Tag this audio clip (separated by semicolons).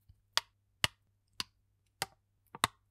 hit; thud